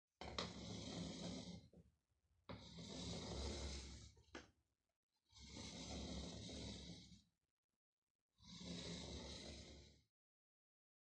hairdrier, crawl, barbershop